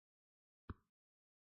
Sound of a single finger tip on a touch screen. Recorded with H2n, optimised with Adobe Audition CS6. Make sure to check the other sounds of this pack, if you need a variety of touch sreen sounds, for example if you need to design the audio for a phone number being dialed on a smartphone.
screen, touch, touch-screen, touchscreen